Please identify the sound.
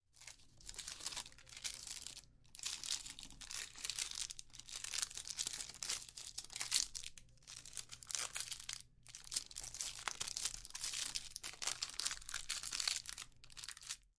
Paper being manipulated and wrinkled to simulate the sound of undoing a wrap.
paper, crumple, wrinkled